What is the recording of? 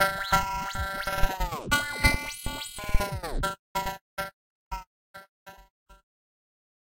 Indian Sound
An indian like processed sound.
Dance, Ethnic, Fx, Indian, Processed, Psytrance, Trance, World